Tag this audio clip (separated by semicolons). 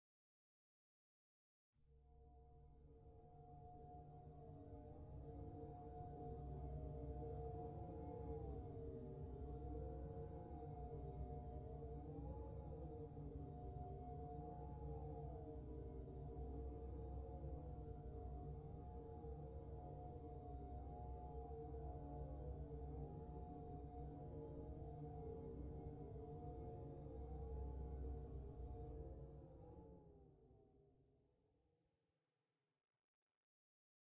haunted; phantom; atmos; atmosphere